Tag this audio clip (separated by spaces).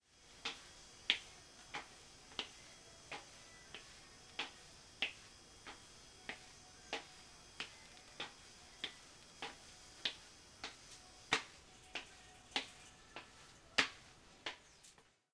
Walking Floor Wood